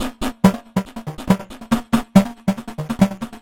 Percussive Loop 140BPM
I was bored and made this loop on FL Studio.
I made this loop using no drum samples. (Adding some effects to little motor sound i recorded)
For making this, you mainly need Gross Beat with Drum Loop preset on volume (the bottom one) section. I have explained all the presets I used for this in the sound I have linked below.
The another loop drum loop i made using this and layering some drum samples
Original motor sound that i used for making this